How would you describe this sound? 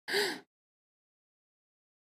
24. exclamacion de sorpresa
exited, happy, suprise